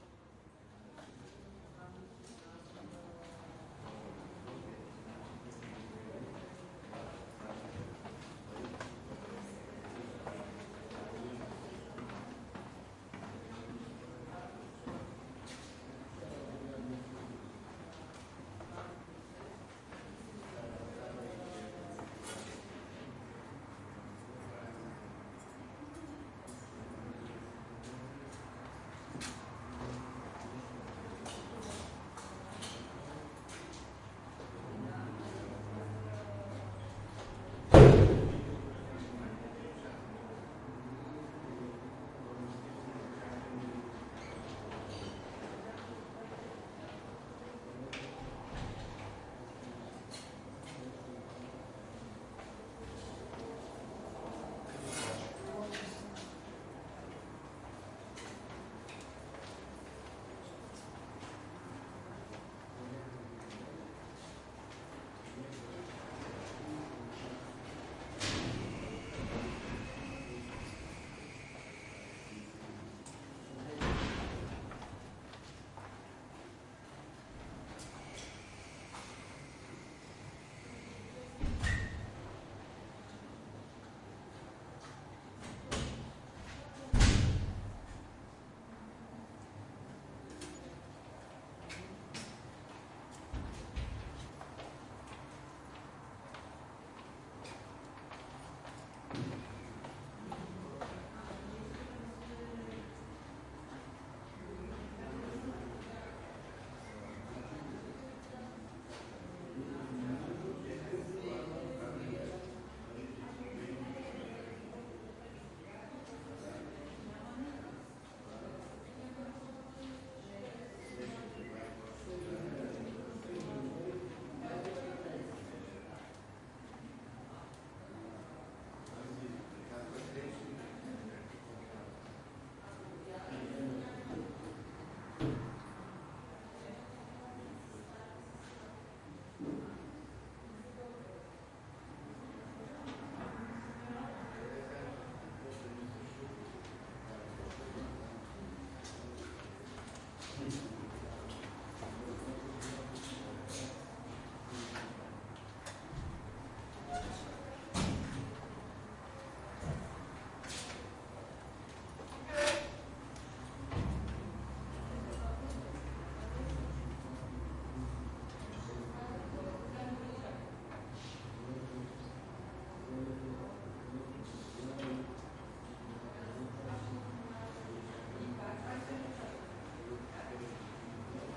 stere-atmo-schoeps-m-s-office
indoors office sounds empty space
indoors, office, sounds